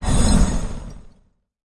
Magic fire spell Cast. Created with Logic's Alchemy Synthesizer.
magician, spell